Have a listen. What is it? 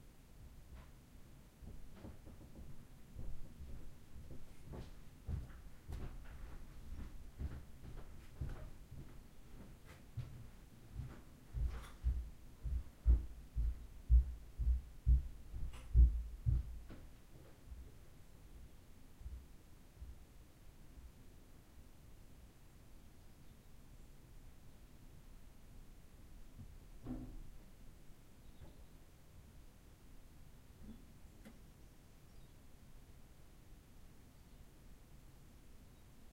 Walking and descend stairs (wood)
Walking upstairs on wodden floor and coming down the wood stairs.
Recorded with Zoom H1.